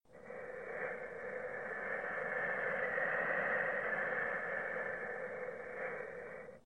Ambient wind. Used in POLAR.
background, silence, ambient, atmosphere, ambience